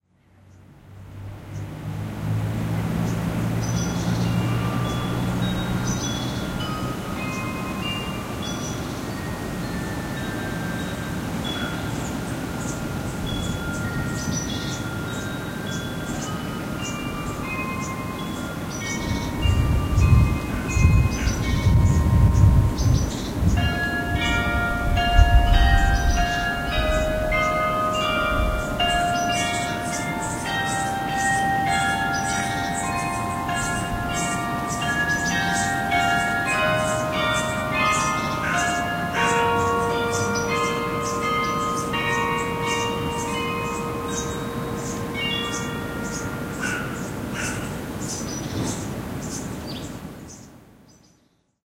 Bells Harnosand
Played from the church tower in Härnösand, sweden, every morning between june-august. Lovely to wake up to.
Recorded from my bedroom window in 2001
Audio.Technica AT825 microphone into a Tascam DA-P1 DAT-taperecorder.
bells, churchbells, hymns